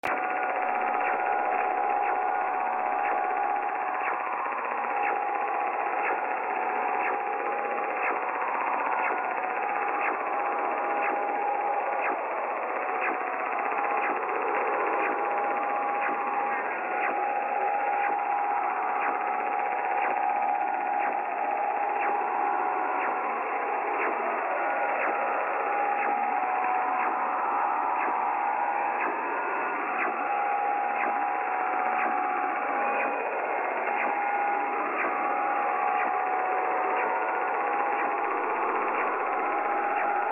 Found while scanning band-radio frequencies.